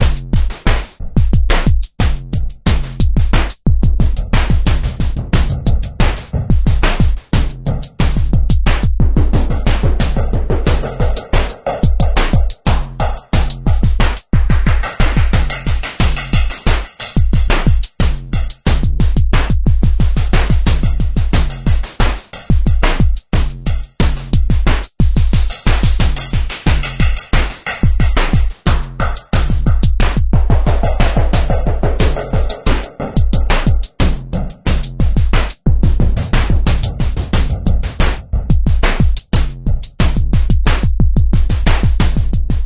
90 bpm ATTACK LOOP 3 drums mixdown mastered 16 bit
This is a drumloop created with the Waldorf Attack VSTi within Cubase SX. I used the Analog kit 1 preset to create this loop, but I modified some of the sounds. Tempo is 90 BPM. Length is 16 measures. Mastering was done within Wavelab using TC and Elemental Audio plugins.
90bpm, analog, drumloop, electro